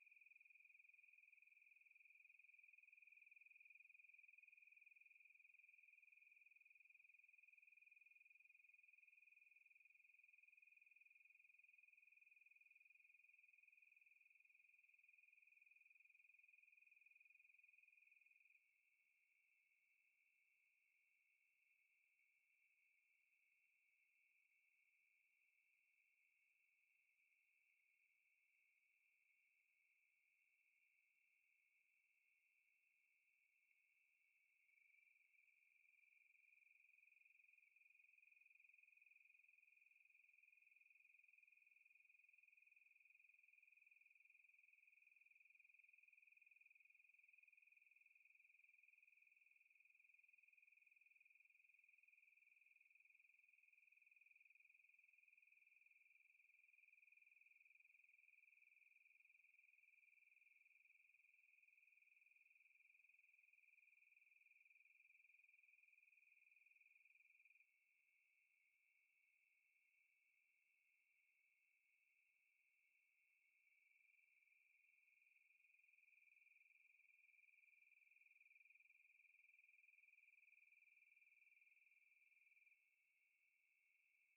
Day Crickets
This was recorded on the Douglas Spring Trial near Tucson, Arizona. It was in the fall toward late afternoon. This was recorded on an iPhone and then cleaned up in Adobe Audition to isolate the crickets.
crickets, insects, field-recording, nature